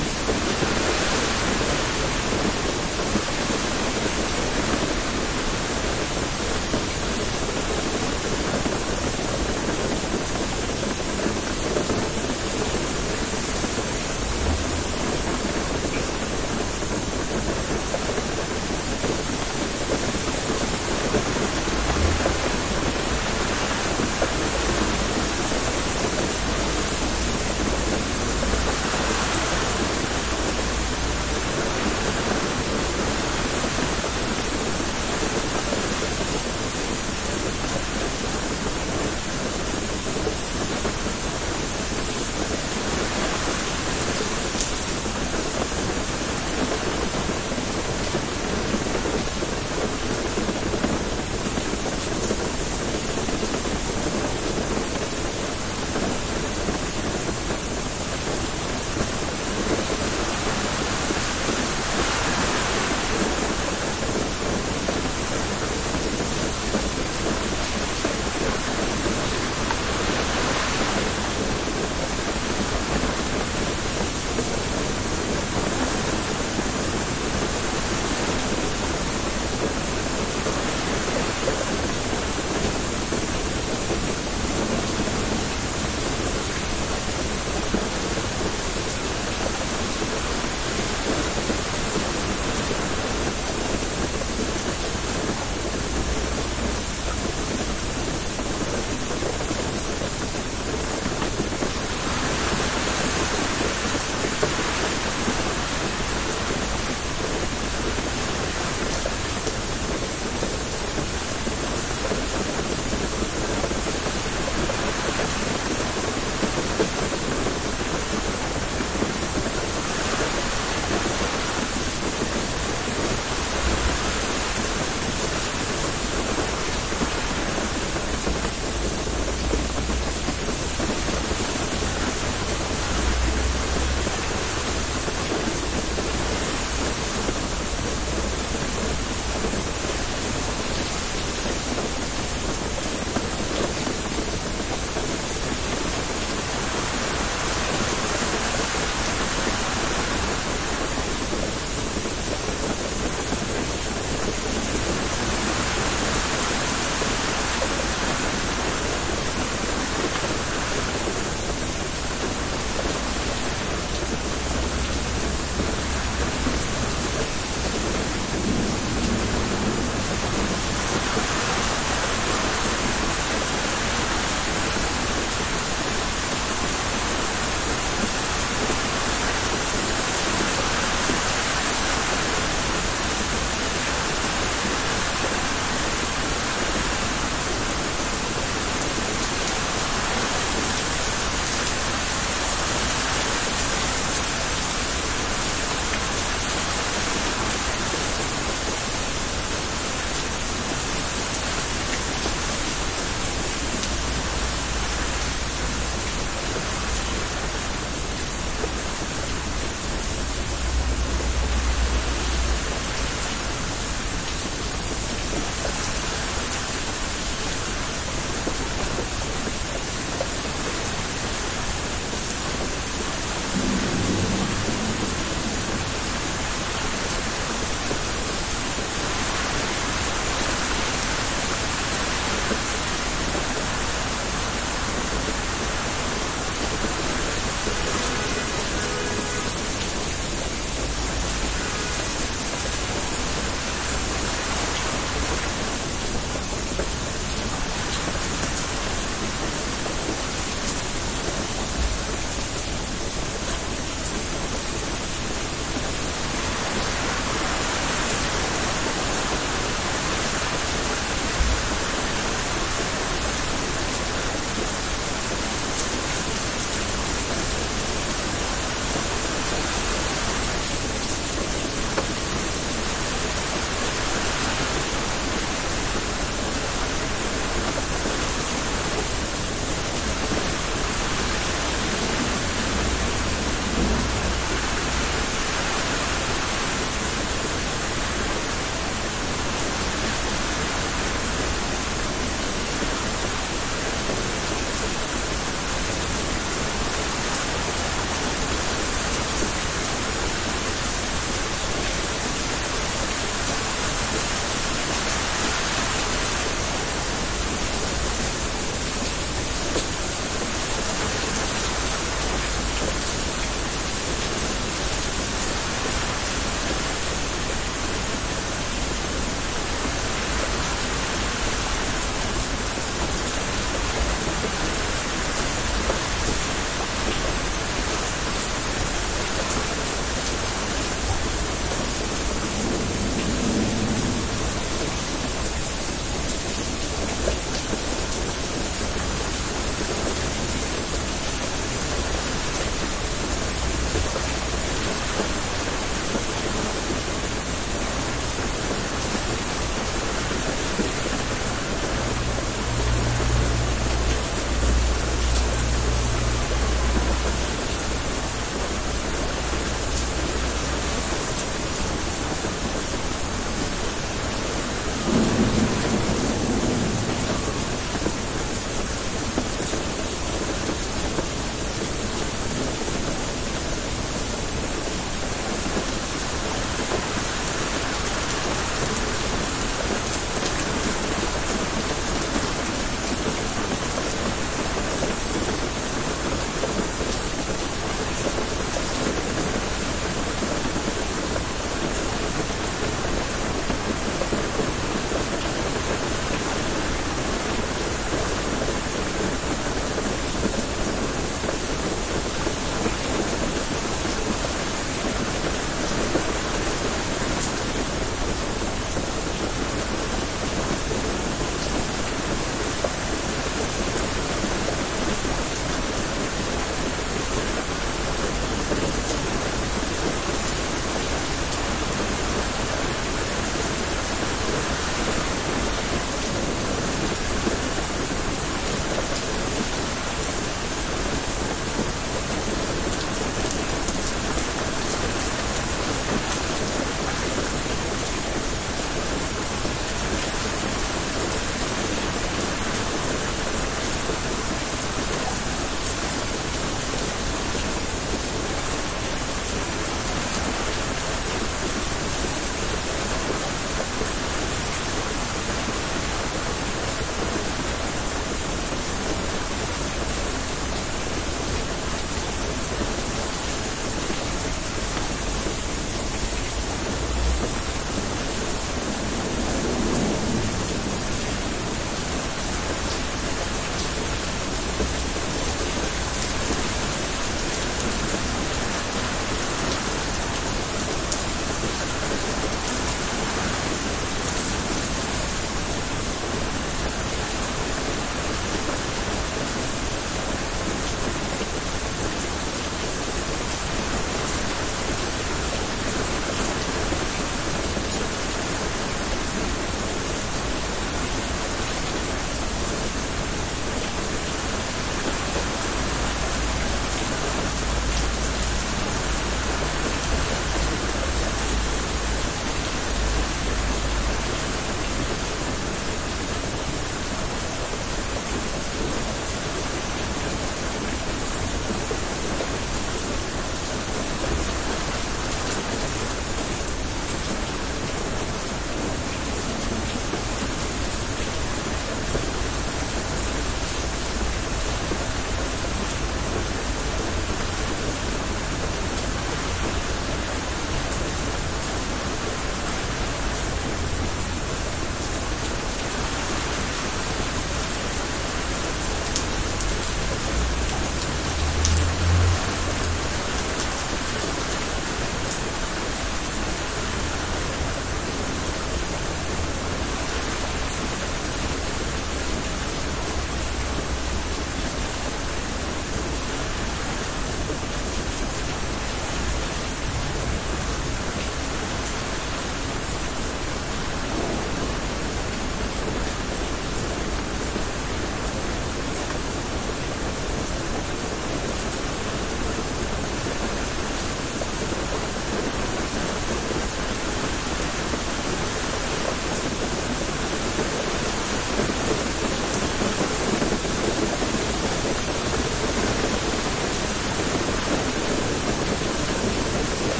Rain Loop
A looping rain with hardly noticeable background noise. In particular: no neighbor's music playing in the background. Some sirens and car passes are there though.
By Sam80: Rain over a car
Edited with Audacity.
Plaintext:
HTML:
bad-weather, gapless, loop, looping, mixed, rain, raining, relaxing, seamless, water, weather